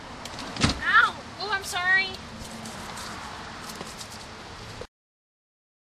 northcarolina roanokerapids exit173 ouch
Minor injury sustained from a slammed door, getting gas next to Santee Resort Inn recorded with DS-40 and edited in Wavosaur.